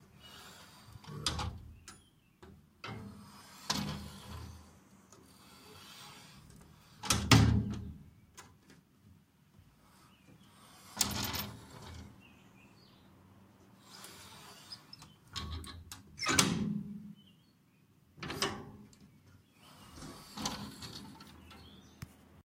Opening and closing off a metal framed window
Metal window being opened and closed
close, metal, open, OWI, Window